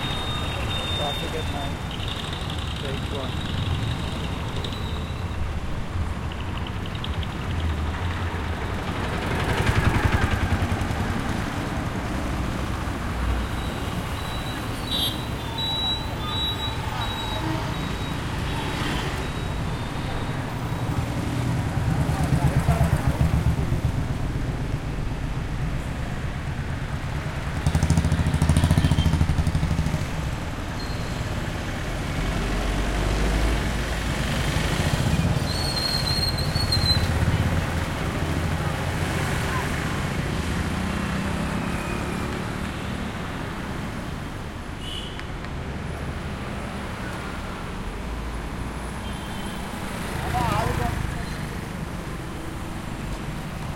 Night traffic Kerala Road 1
Recorded using Zoom H4N Pro. traffic ambience at night. Kerala Roads. Cars, Truck, Bikes passing
street, noise, car, ambience, road, field-recording, city, cars, traffic